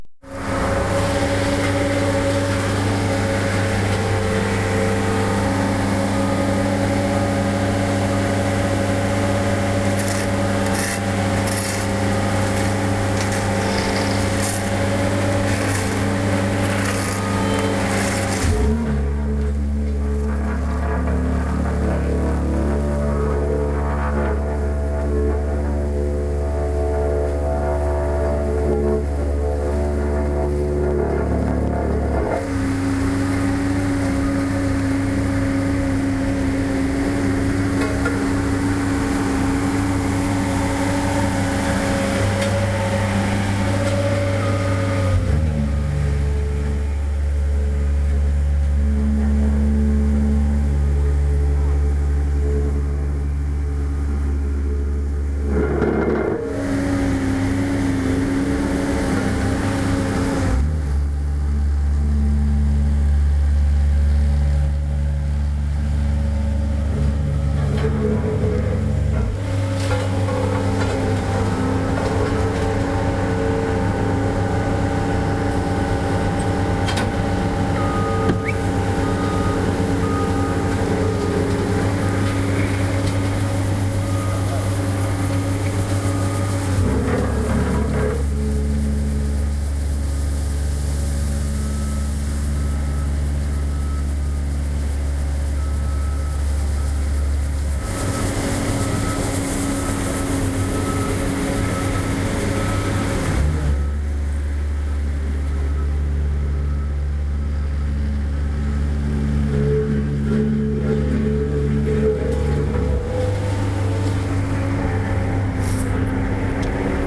lackey070330 0908a steamroller4
Small paving roller, especially rich buzzing rattling growl roar. Worker short whistle of warning at about 1:18. Rec w/ iPod, Belkin TuneTalk Stereo; "autogain" circuit applied extreme compression ("pumping") due to sound intensity; some artifacts.
environmental, growl, tractor, urban, engine, steamroller, pavement, worker, road, crew, paving, construction, city, buzz, equipment, noise, roller, construction-worker, bass, steam-roller, machine, drone, hum, whistle, environment